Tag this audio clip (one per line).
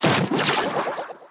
laser
ray
weird
sci-fi
piercing